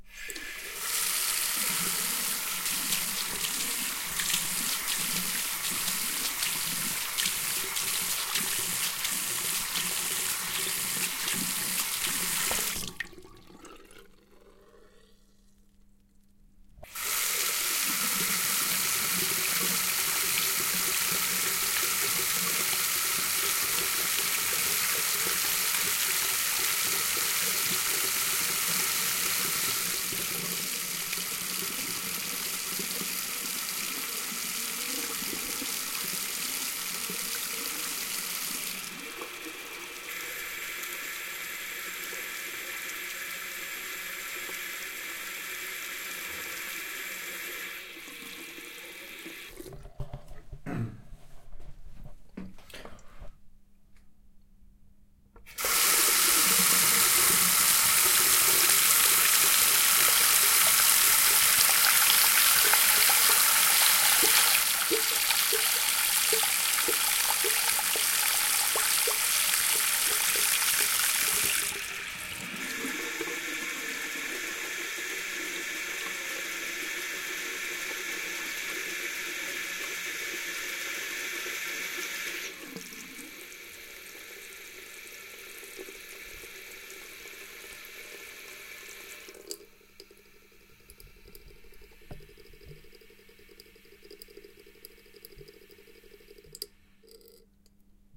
Water tap flow different strenght bathroom CsG
running, sink, tap, water